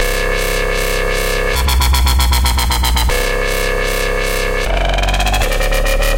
dubstep wobble bass 155BPM
Some nasty wobble basses I've made myself. So thanks and enjoy!
bass, bitcrush, dark, dirty, dnb, drum, drumnbass, drumstep, dub, dubby, dubstep, filth, filthy, grime, grimey, gritty, loop, wobble